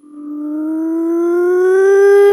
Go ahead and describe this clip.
- Voice recorded
- Speed changed (-76%)
- Pitch lowered (-50%)
- Reversed